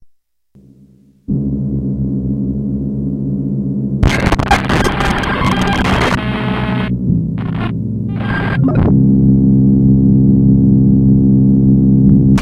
airplane bermuda triangle
Casio CA110 circuit bent and fed into mic input on Mac. Trimmed with Audacity. No effects.